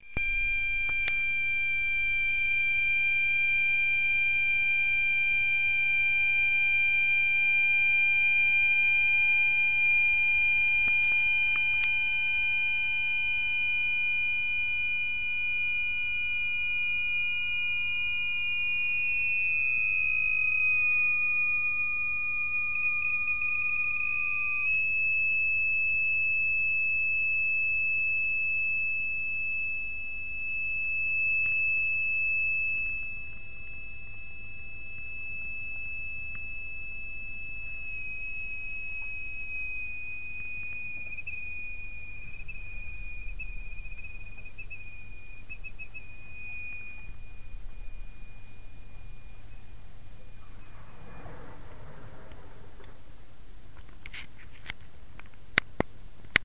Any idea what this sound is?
After the boiling, our coffee maker did that sound.
coffee, maker